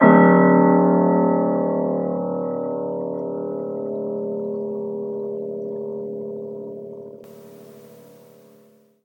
88 piano keys, long natural reverb: up to 13 seconds per note
THIS IS ME GIVING BACK
You guys saved my bacon back in the day. Recently I searched for free piano notes for a game I'm making, but the only ones I could find ended too quickly. I need long reverb! Luckily I have an old piano, so I made my own. So this is me giving back.
THIS IS AN OLD PIANO!!!
We had the piano tuned a year ago, but it is well over 60 years old, so be warned! These notes have character! If you want perfect tone, either edit them individually, generate something artificially, or buy a professional set. But if you want a piano with personality, this is for you. being an old piano, it only has 85 keys. So I created the highest 3 notes by speeding up previous notes, to make the modern standard 88 keys.
HOW THE NOTES WERE CREATED
The notes are created on an old (well over 50 years) Steinhoff upright piano. It only has 85 keys, so I faked the highest 3 keys by taking previous keys and changing their pitch.
sustain, piano, reverb, complete, keys, notes, old